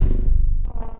STAB 081 mastered 16 bit
Electronic percussion created with Metaphysical Function from Native Instruments within Cubase SX. Mastering done within Wavelab using Elemental Audio and TC plugins. A weird spacy short electronic effect for synthetic soundsculpturing. Almost usable as a loop on 60, 90 or 120 bpm...
percussion, electronic, stab